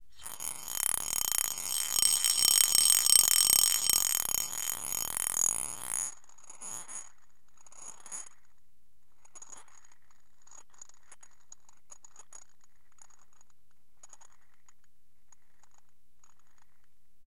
marbles - 15cm ceramic bowl - swirling marbles around bowl - 1 ~13mm marble
Swirling an approximately 13mm diameter glass marble around a 15cm diameter ceramic bowl.
glass, ceramic, swirl, glass-marbles, marble, ceramic-bowl, marbles, swirling